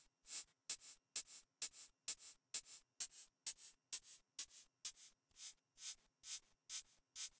FRT CH 2130
Hi-Hat modular morph
Hi-Hat, modular, morph